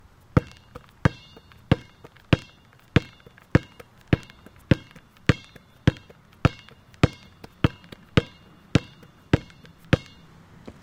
A basketball bouncing on the pavement
Basketball, Outside, Sports